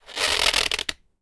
Raw audio of twisting a wet polystyrene bodyboard with my hands. Part of a sound library that creates vocalization sounds using only a bodyboard.
An example of how you might credit is by putting this in the description/credits:
The sound was recorded using a "H1 Zoom recorder" on 16th August 2017.